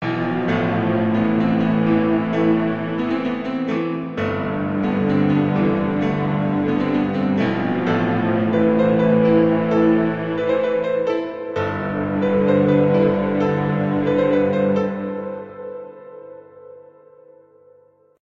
sad orchestration with, Piano and string ensemble, created in Fl Studio, by twintunes
cinematic, piano, Sad